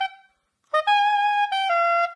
Non-sense sax plaied like a toy. Recorded mono with dynamic mic over the right hand.
sax, soprano, soprano-sax, saxophone, melody, loop, soprano-saxophone